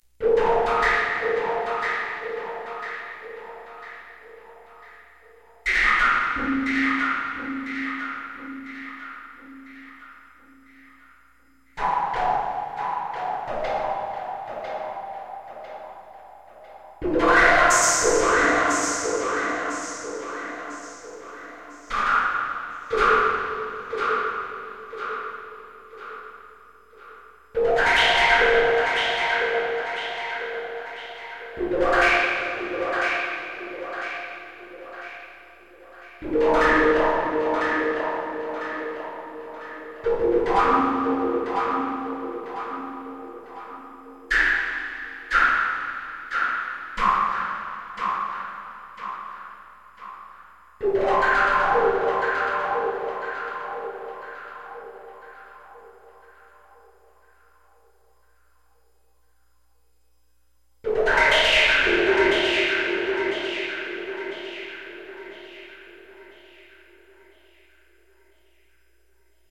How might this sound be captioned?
I invented a sound on a Yamaha DX-7 synth and then added echo in Garageband. Used for a book on tape.
creepy, echo, noise, synthesiser